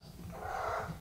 Catroom Meow 4
Recorded in a small bathroom using an MXL 993
field-recording, meow